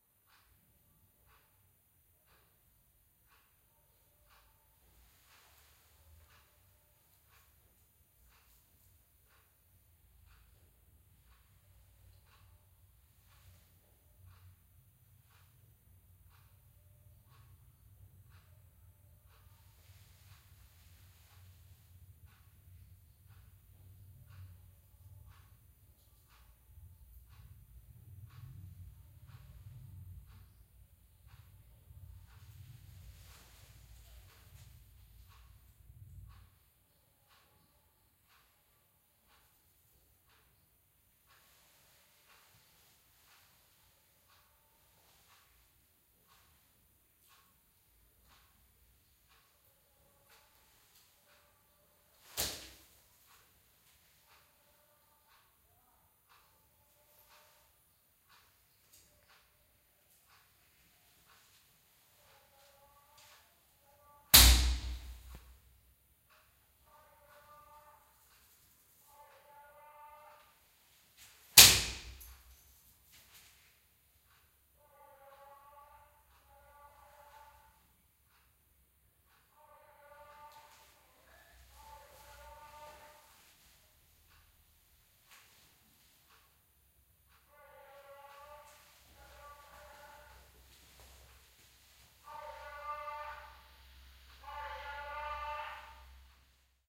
clock was ticking.
i was killing flies.
and a peddler was approaching.
stereo recording via my XZ1 Compact.
faded out via Audacity, no further process.
you may add a compressor or limiter if necessary